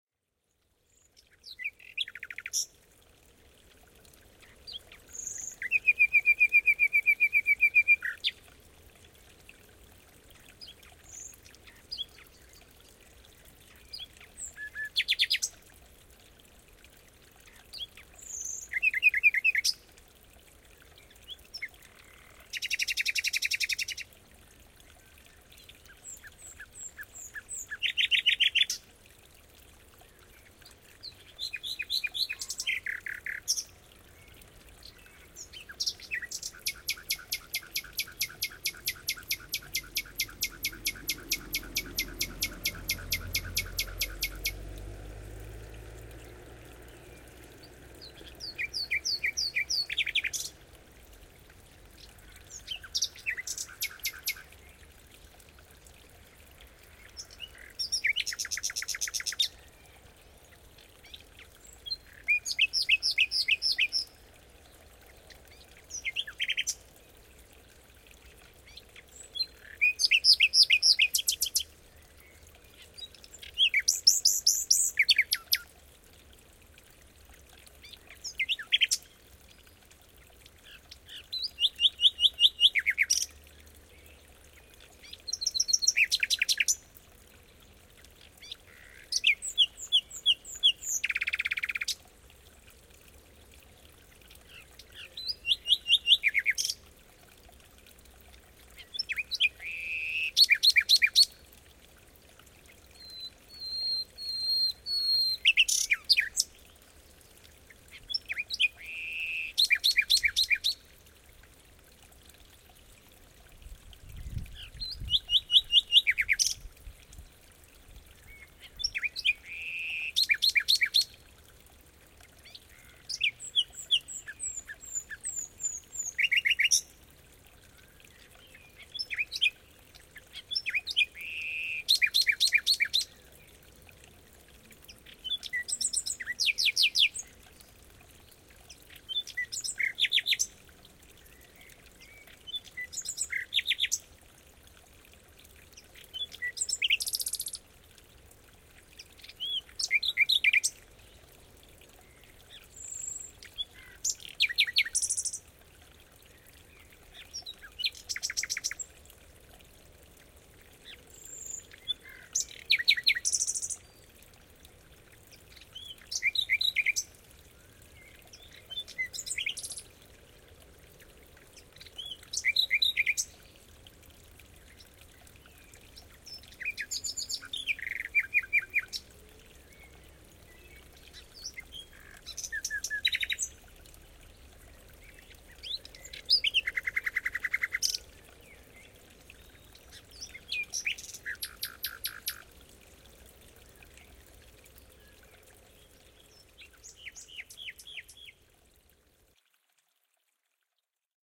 nightingale brook mix
Remix of two different recordings: Nightingale singing in a forest at springtime and the sound of a small brook in the mountains. Fine for some minutes of recreation.
relax, meditation, birdsong, ease, brood, chill, contemplate, meditate, forest, brook, nightingale, chill-out